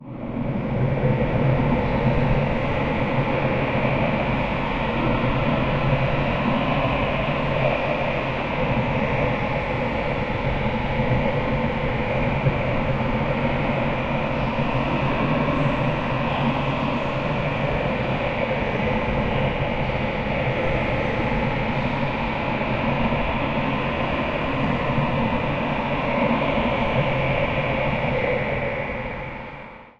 02 Otherworld, Flat, Ghostly Breaths, Spacious, Eerie, Drone, Evolving, Flowing, Underwater, Dive Deep 2 Freebie
Enjoy my new generation of udnerwater ambiences. Will be happy for any feedback.
Check the full collection here: